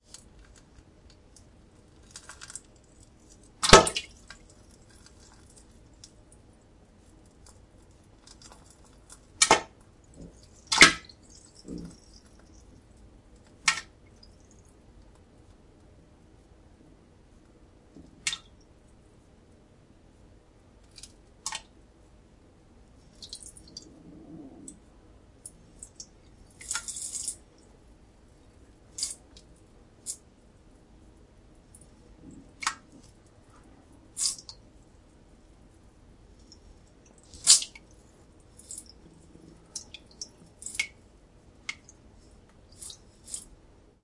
Shitting and pissing in the bathroom.
and in the included documentation (e.g. video text description with clickable links, website of video games, etc.).